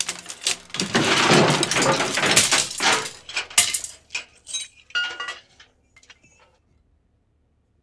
built for a show called Room Service, this cue was one of three choices for an effect. It has coathangers, boxes, etc crashing down.
walking, into